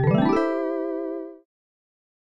Success jingle for video games.
SPOTTED IN:
finish, game, video-game, end